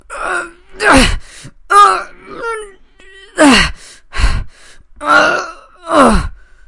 Human groan female 01
sound of a woman groaning
female, groan, woman